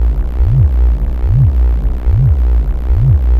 bassline newater deeper
I want to incorporate this into my next song. Hopefully it will work at around 98 bpm. This one is filtered compared to the one that doesn't have "deeper" in the title.
bass, bassline, deep, phaser, pulse, pulsing, riff, throbing, wah